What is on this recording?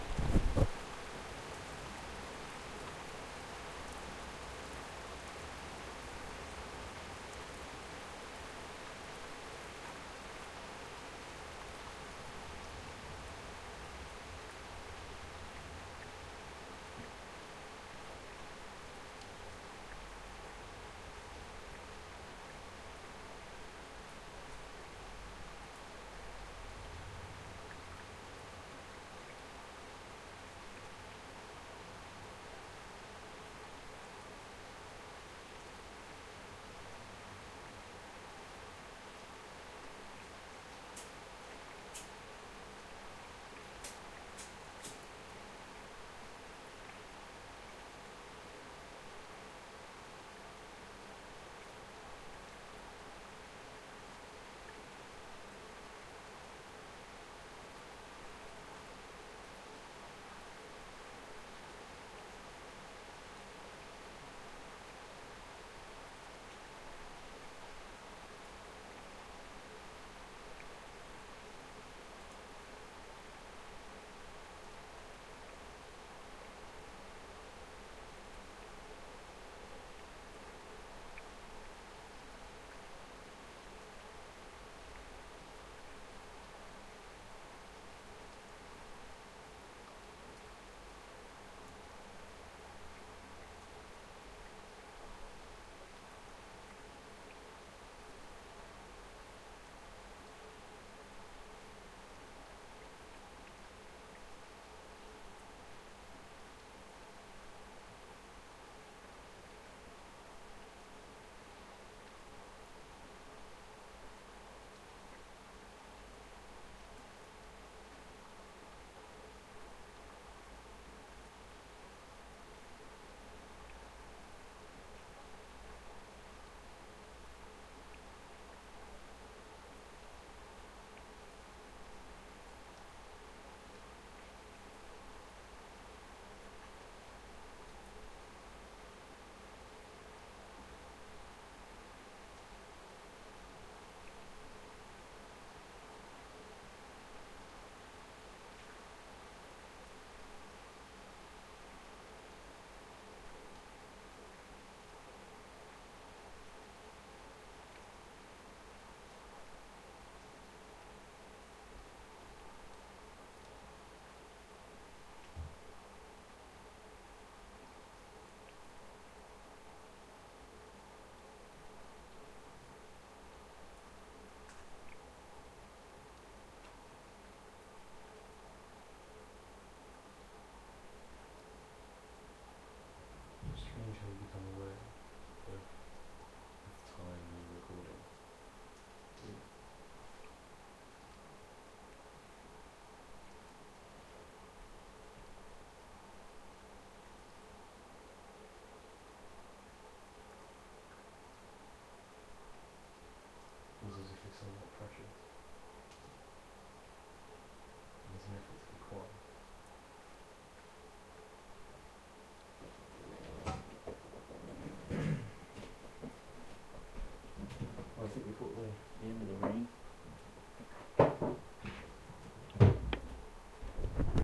Air tone Rain London Night
Air tone with rain and general city sounds late at night.
soundscape, sound-design, sfx, atmosphere, general-noise, urban, ambiance, rain, field-recording, street, ambience, sound, weather